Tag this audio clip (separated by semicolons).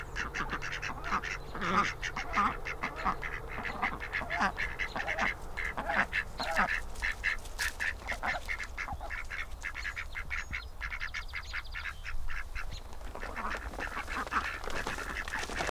Duck; open; fx; effect; sound; quacking; distance; while; were; recorded; recorder; space; approaching